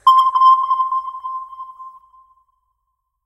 HITS & DRONES 16
Sound Fx created @ MarkatzSounds
great for broadcasting,commercials & such
Fx, broadcasting, Sound